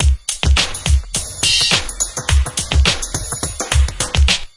home-made breakbeat
breakbeat, loop